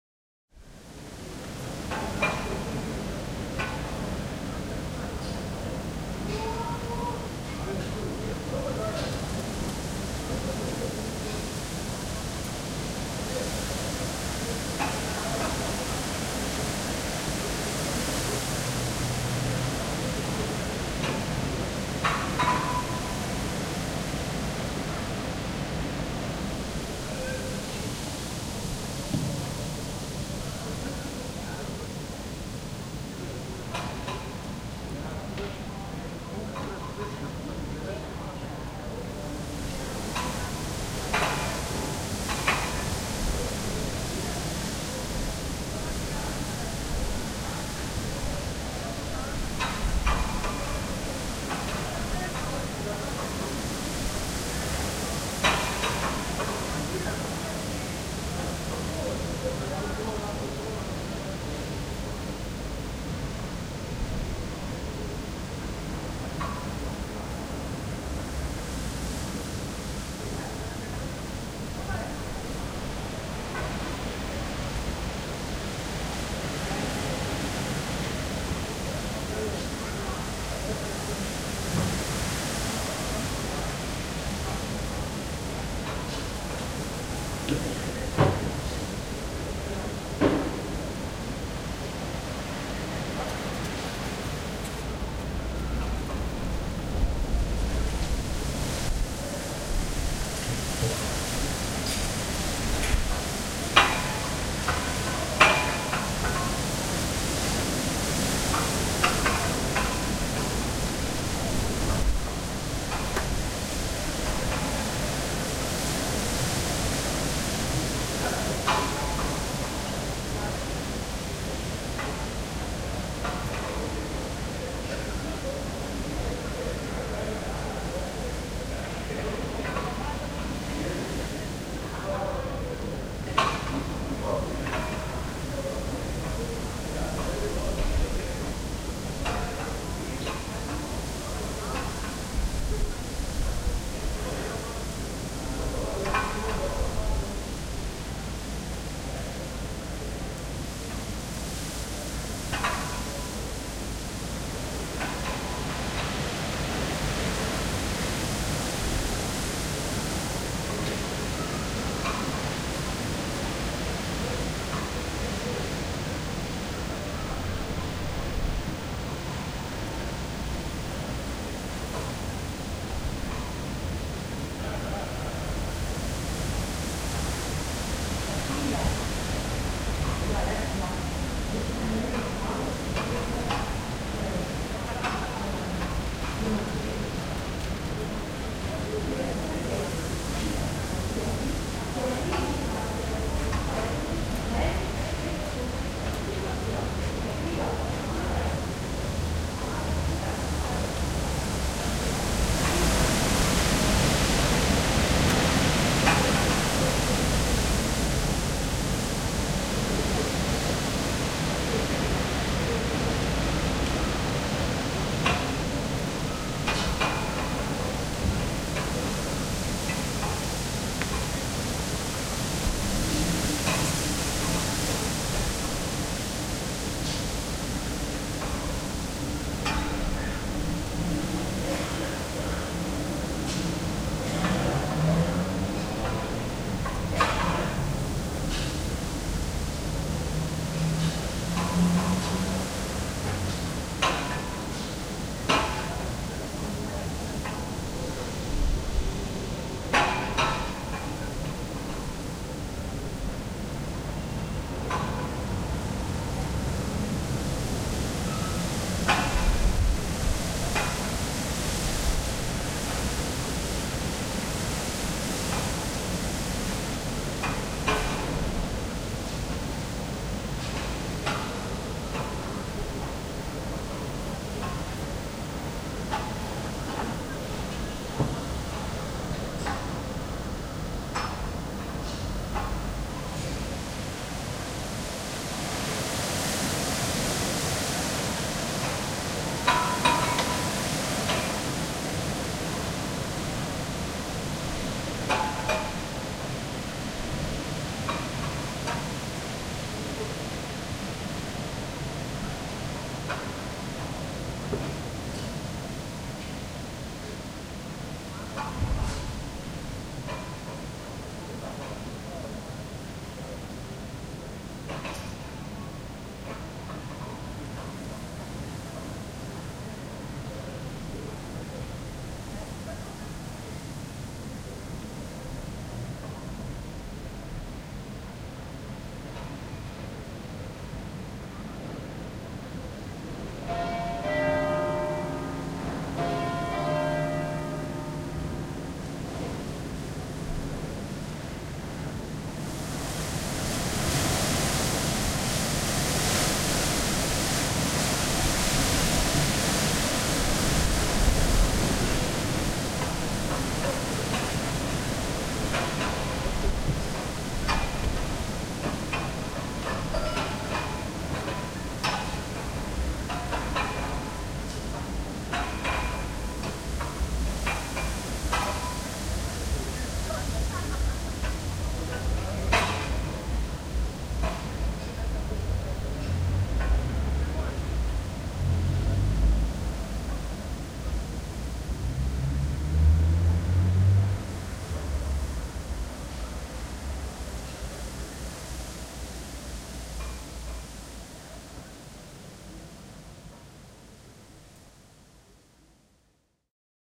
A very windy night
A very windy summer night in Maribor. Some people, cars, mostly wind in the trees and some flags hitting their poles and producing metal sounds.
field-recording, night, wind